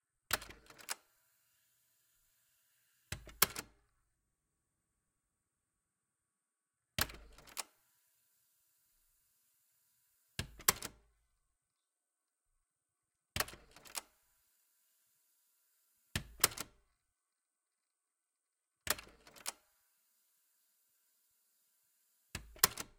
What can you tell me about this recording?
Play and Stop button sounds from the listed cassette recorder